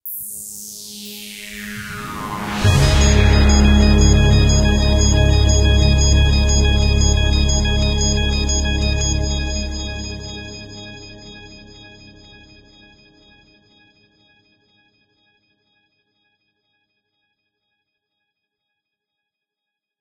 Natty's Ident (80s logo sting)
This is my take on a forum sample request.
Using physical instruments, I've used an old Elka X605 organ to make the bright main dah deh dip sound, and part of the bass. Also deep down, there's the warm choir of a Roland VP-330 hiding down there, to give it that authentic 80s feel. The rest of the sounds were made with a modern digital workstation keyboard.
80s, ident, intro, logo, sting, synth